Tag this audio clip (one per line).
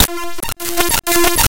databending
unprocessed
raw
glitch